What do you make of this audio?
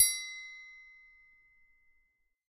Hard open triangle sound